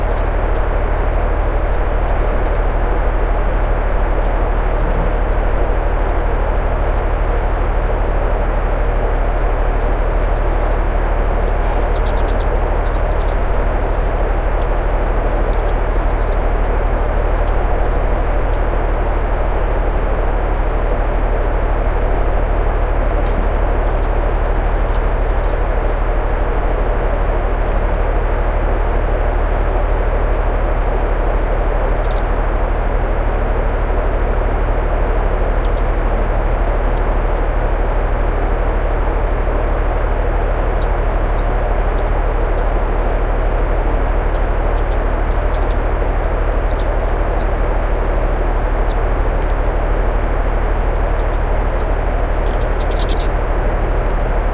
Even during the daytime those bats (the common pipistrelle bat)are chatting away in their roost underneath the roof of a house in rural Perthshire. Oade FR2-LE recorder and AT3031 microphones.
bat, field-recording